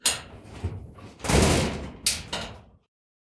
long-metal-hit-02
Metal hits, rumbles, scrapes. Original sound was a shed door. Cut up and edited sound 264889 by EpicWizard.
shiny, industrial, blacksmith, steel, scrape, hit, iron, percussion, metallic, nails, lock, factory, metal, rumble, bell, hammer, clang, industry, rod, pipe, shield, impact, ting